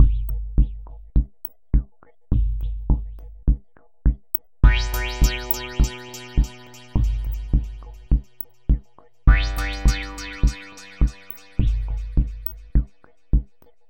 A 13 second loop, simple beats and echoes with panning bass stabs. Free for all. Enjoy!
industrial, ambient, simple, loop